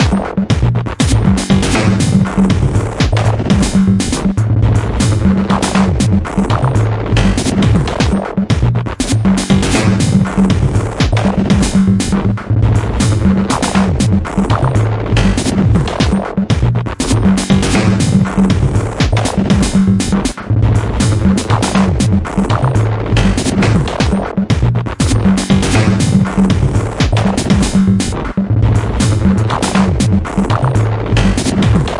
Crazy rhythm loop 120 BPM 009
First rhythmic layer made in Ableton Live.Second rhythmic layer made in Reactor 6,and then processed with glitch effect plugin .
Mixed in Cakewalk by BandLab.